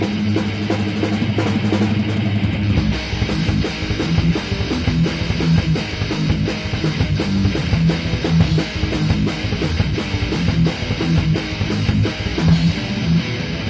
Metal Band Jam 5 Thrash
2 electric guitarists and one drummer jam metal and hardcore. Fast thrashing.
Recorded with Sony TCD D10 PRO II & 2 x Sennheiser MD21U.
instrumental, band, punk, metal, drums, hardcore, rock, guitar, drum, heavy, electric, jam, guitars